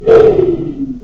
ghost scream woosh
low rate sigh